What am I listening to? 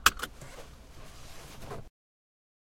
peugot 206 car interior loosening seatbelt
Recorded with a Sony PCM-D50 from the inside of a peugot 206 on a dry sunny day.
loose, interior, loosening, safety-belt, release, 206, car, seatbelt, peugot